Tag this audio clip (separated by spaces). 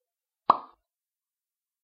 bubble mouse-click picker click burst click-button bubbles bubble-burst explode button pick